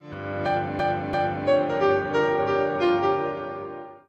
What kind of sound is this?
Blues for the masses 01
Jazz or blues piano samples.
blues, classic, classical, instrumental, jazz, midi, piano